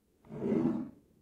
Glass Slide 10
Me sliding a glass cup around on a wooden surface. Check out my pack if this particular slide doesn't suit you!
Recorded on Zoom Q4 Mic